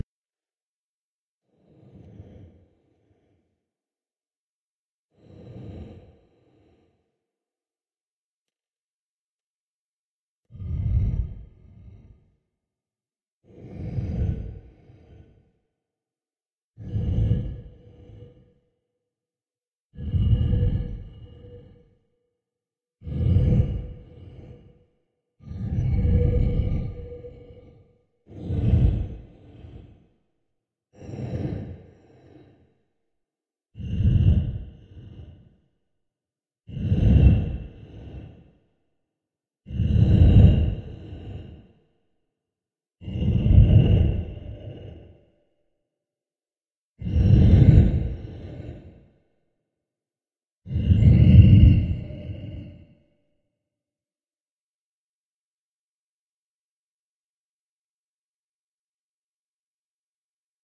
signalsounds for dark scary sound design
dark, signals, ambiance, sound-design, synth